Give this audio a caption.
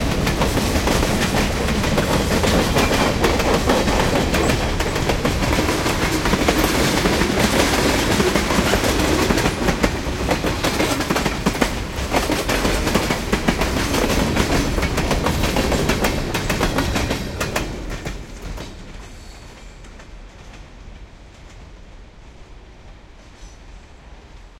freight train pass fast short heavy rail track clacks
fast, clacks, pass, freight, heavy, track, rail, train